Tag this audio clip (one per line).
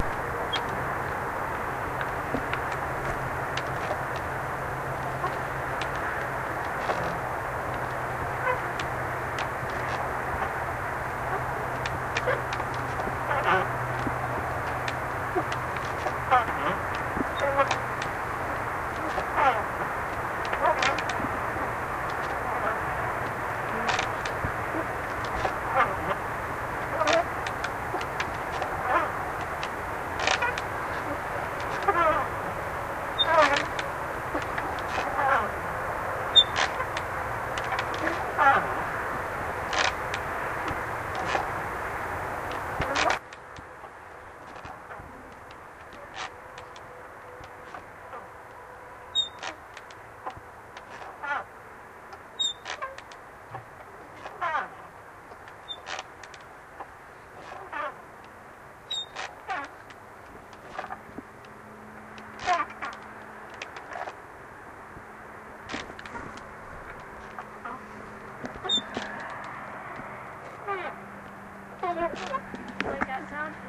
field-recording
hydrophone